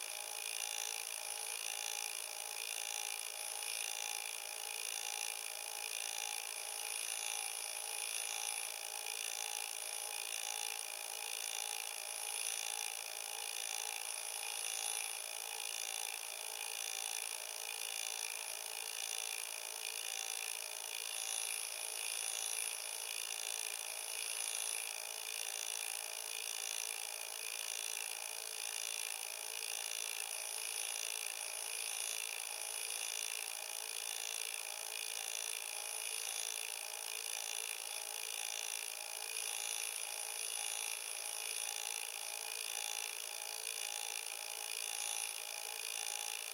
This is the sound of a Lenovo Watch 9 (battery powered analog smart watch) in fast-forward mode while changing the time. The second hand is spinning around at about 1 revolution per second. The sample will loop indefinitely.
Recording device - Sony PCM-A10 resing face down on the watch with mechanical contact between the recorder and watch.